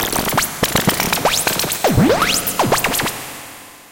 ELECTRIBE TWEAK
A sound of squeltching electro madness I made on my Korg Electribe SX
electribe, electro, squeltch, sx